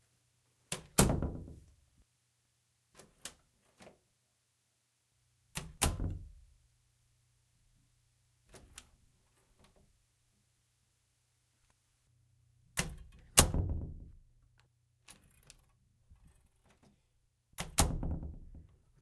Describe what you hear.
Opening closing bedroom1 door
Closing, then opening, then closing a bedroom door. It rattles a lot when I close it.
building, close, door, heavy, home, house, live, loud, open, rattle